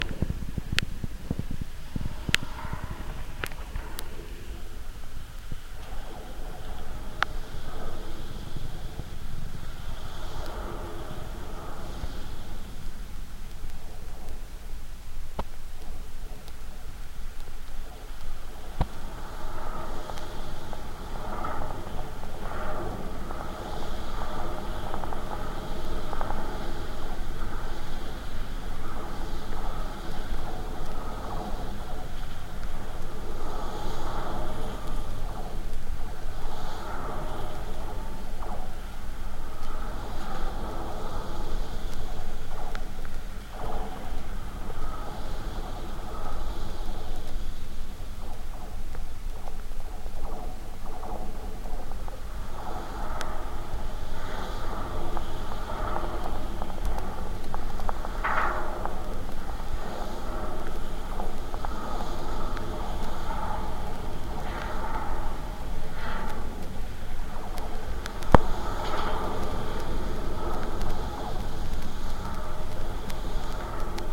GGB suspender SE20SW
Contact mic recording of the Golden Gate Bridge in San Francisco, CA, USA at southeast suspender cluster #20. Recorded December 18, 2008 using a Sony PCM-D50 recorder with hand-held Fishman V100 piezo pickup and violin bridge.